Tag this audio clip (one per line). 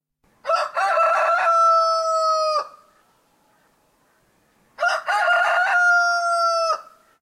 animal
Crows